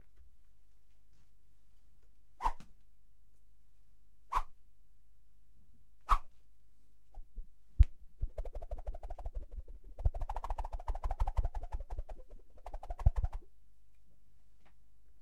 Wooshs 04 (medium) +
a serie of three WOOSH
cravache, hi-res, hires, woosh